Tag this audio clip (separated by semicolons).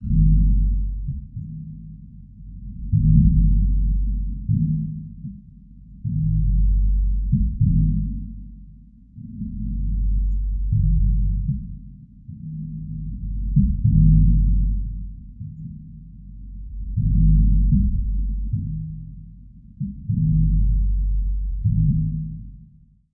Bass; Creepy; Drum; Low; Mysterious; Percussion; Spooky; Tom; Tribal